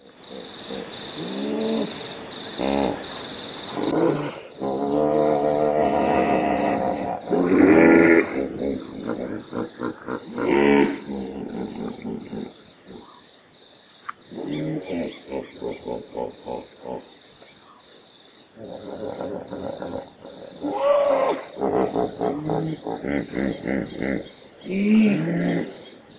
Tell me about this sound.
a group of hippos is grunting in Kafue River in Zambia. recorded in the morning on safari